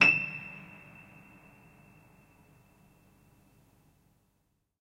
Recording of a Gerard-Adam piano, which hasn't been tuned in at least 50 years! The sustained sound is very nice though to use in layered compositions and especially when played for example partly or backwards.Also very nice to build your own detuned piano sampler. NOTICE that for example Gis means G-sharp also kwown as G#.
string, sustain, piano, detuned, horror, pedal, old